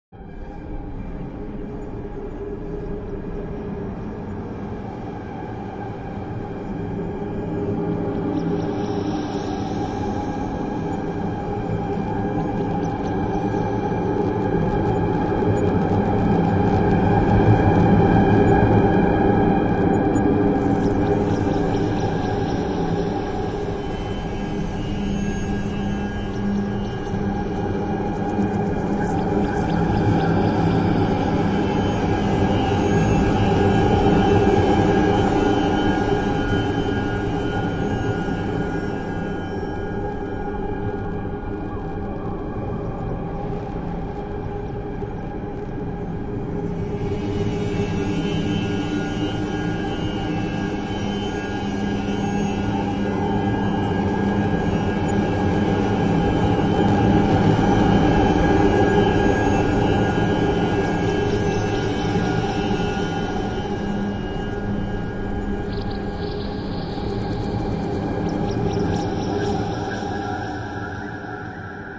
Dark Horror Forest Soundscape
Drone, Ambient, Ambience, Scary, Dark, Atmosphere, Free, Soundscape, Film, Spooky, Forest, Movie, Horror, Cinematic, Ambiance